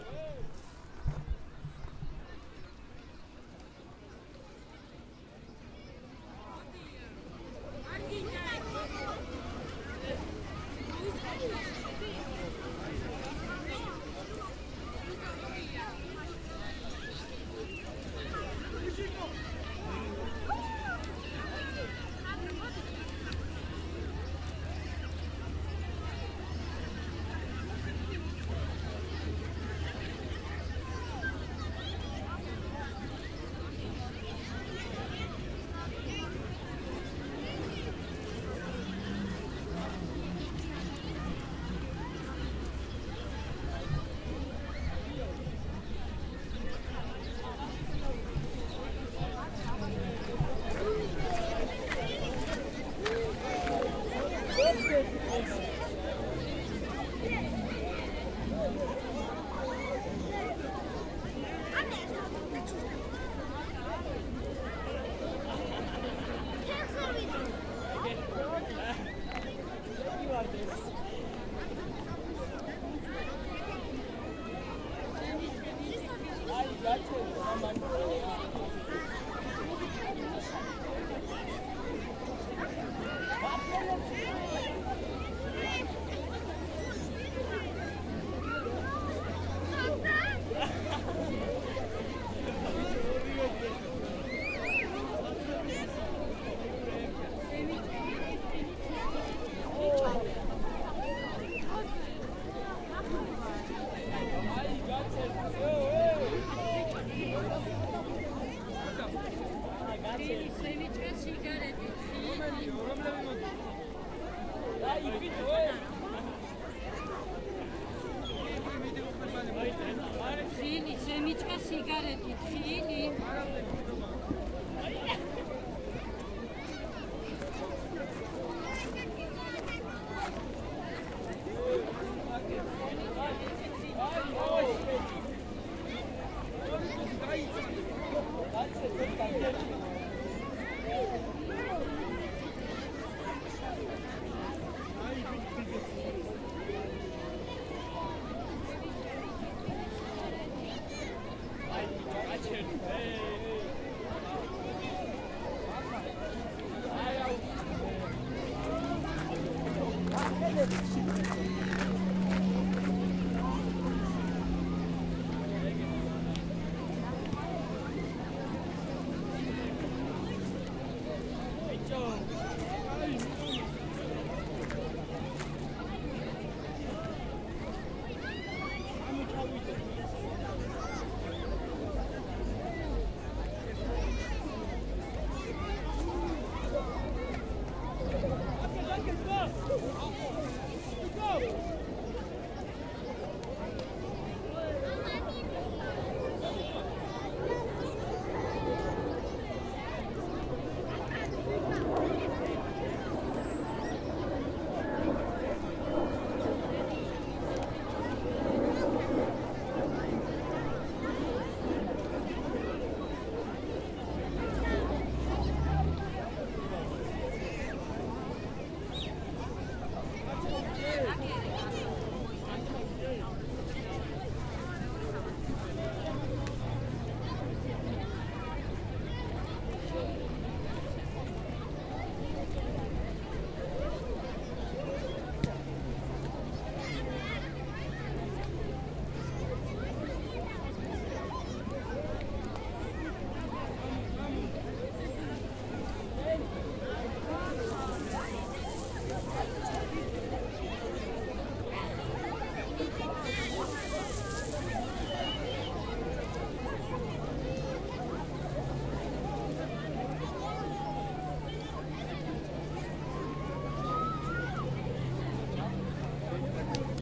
Lot's of people near the Tbilisi sea.
field-recording, sea, tbilisi